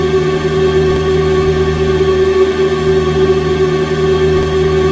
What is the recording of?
Created using spectral freezing max patch. Some may have pops and clicks or audible looping but shouldn't be hard to fix.
Soundscape, Sound-Effect, Freeze, Atmospheric, Everlasting, Perpetual, Background